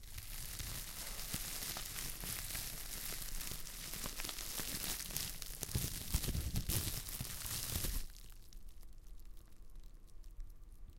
Rustling bubblewrap. See other clip for the actual popping of the teeny bubblez.